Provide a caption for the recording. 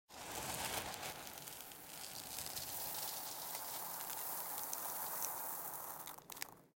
Pouring grain or seeds
A cherry stone pillow, close up.
Homerecording, so some very distant background noise.
stone, pillow, debris, falling, pouring, foley, small, cherry